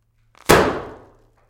zip lock bag popping
poping, bag